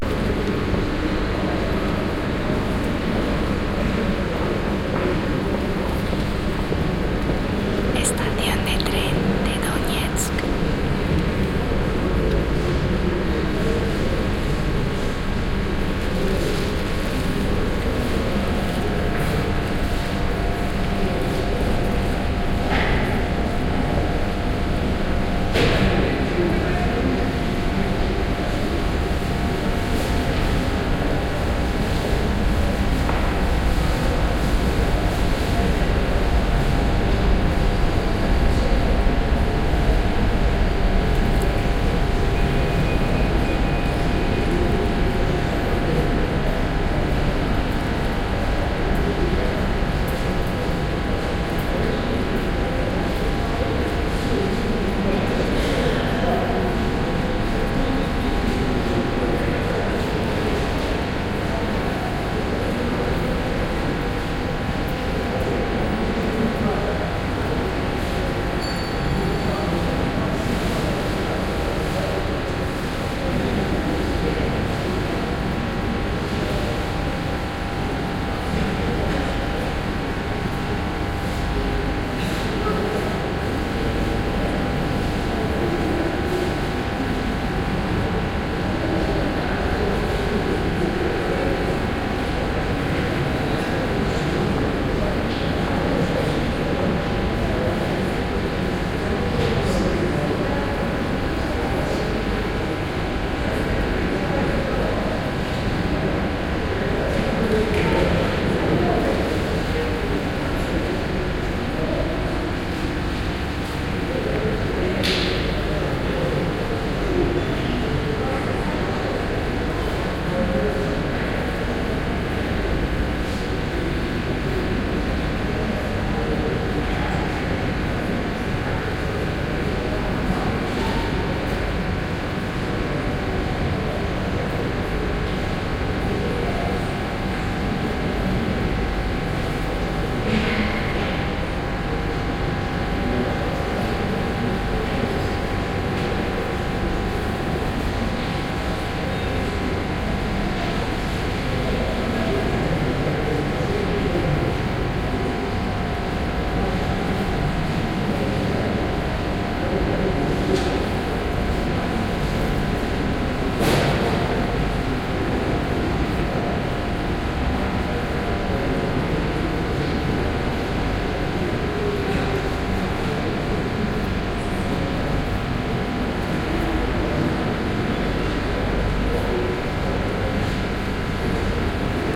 17-donetsk-trainstation-waiting-room-noise
Person sitting right to the recorder whispers the location in spanish... In donetsk, waiting in the waiting room of the train station. People walking past. You can hear the horrible buzzing of a transformer.
buzz, waiting-room, field-recording, noise, tain, ambience, station, donetsk